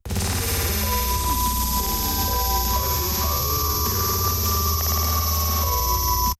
Getting to know my new DAW Reaper, fed something back into itself somehow while exploring routing capabilities.